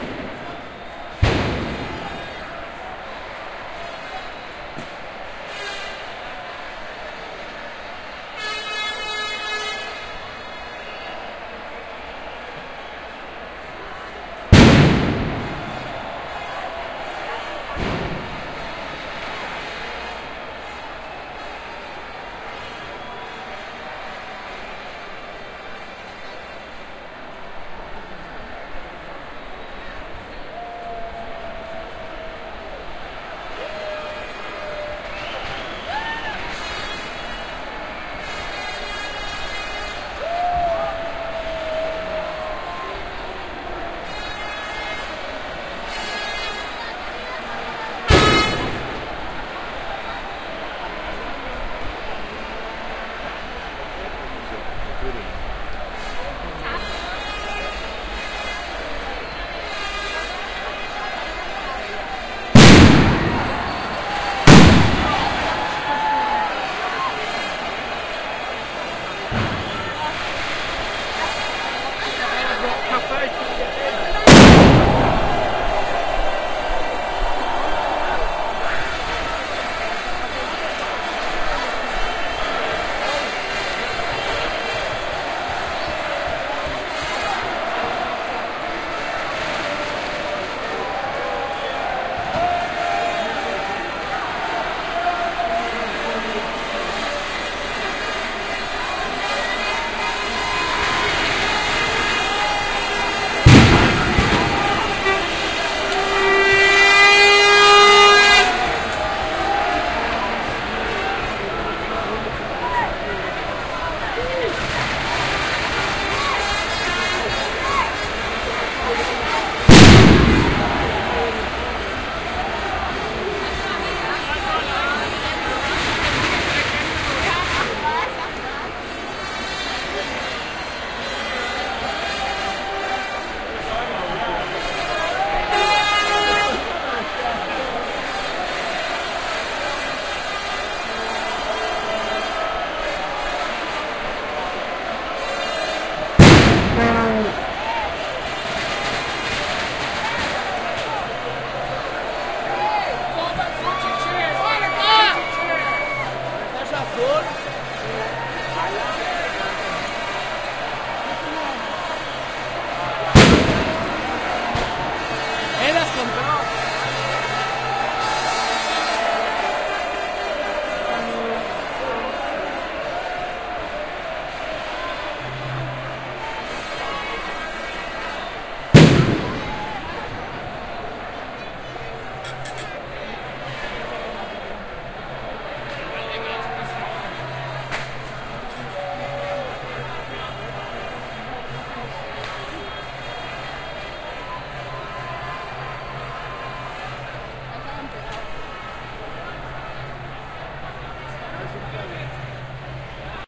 barca versus arsenal preview
Recorded 30 minutes ago at "font de canaletes", the fountain on La Rambla de Barcelona. When Barça (the club) wins, people gather at canaletes to cheer for their favourite club. This time Barcelona has won the Champions League (against Arsenal), so, more than reason for hundreds (thousands) of crazed fans to go crazy. NOTICE: this is just a very raw preview, tomorrow I upload edited versions of my whole recording.